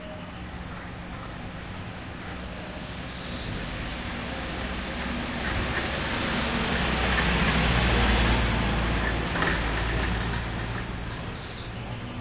small truck passes by
by, car, drive, driving, lorry, pass-by, road, truck, van, vehicle